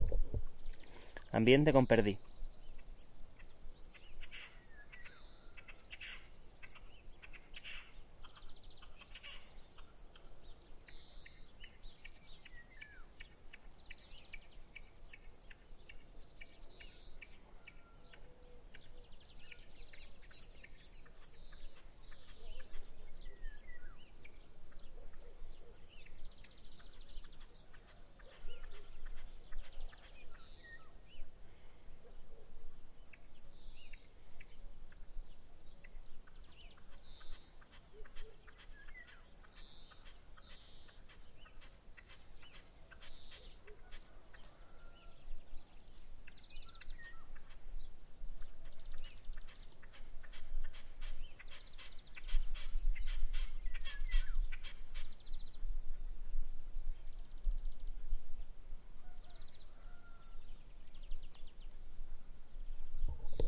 Ambiente Sierra Nevada con perdiz en primavera | Mountain field recording with partridge
Atmosphere recorded in the field in a small mountain village. Spring ambient with birds and a partridge in the background.
Ambiente grabado en nuestra finca en la falda norte de Sierra Nevada. Grabado con/Recorded with Zoom H5. Grabado con José Fco Cascales Granados.
atmosphere, sur, nature, perdiz, a, sierra-nevada, spain, south-spain, partridge, pajaros, spring, birds, ambient, field-recording, espana, naturaleza, ambiance